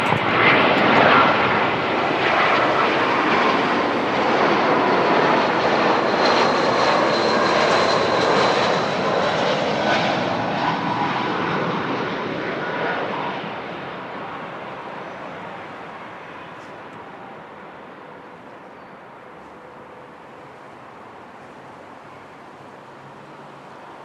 FX - avion